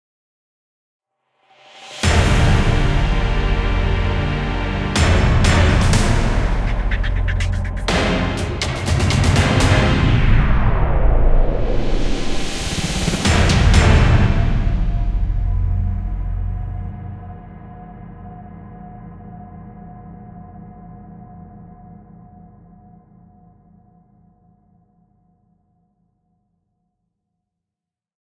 Action Cue
Used Kontakt, Damage Zero-g processed through Alchemy.
Had this uploaded already awaiting moderation, but decided to re-master it just a touch.